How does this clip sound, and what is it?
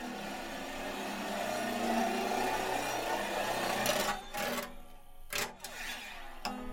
MILK FROTHER GUITAR SLIDE 1
Took hand held electric milk frother and played dobro with it
experimental, guitar, rise